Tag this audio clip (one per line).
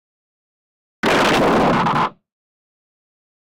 action
movie
old